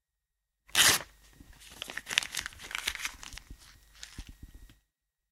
This sound is created with a record of a person ripping and crumpling a piece of paper. I have applied a noise reduction, compression and normalize effect on the sound to make it clearer and eliminate the noise on it.
Then, I cut the introduction/outroduction to make it useable.
Typologie de Schaeffer :
V’ - Impulsion variée : deux dynamiques de sons mais uniquement des impulsions (pas de son continu ou de répétition)
Morphologie de Schaeffer :
Masse : groupe de sons
Timbre harmonique : Froid
Grain : Le grain de cet enregistrement est plutôt lisse, le son n’est pas rugueux
Allure : Pas de vibrato
Dynamique : l’attaque est très nette et violente/sèche
Profil mélodique : Le son est saccadé, il n’y a donc pas vraiment de variations mais plutôt des coupures.
Profil de masse : /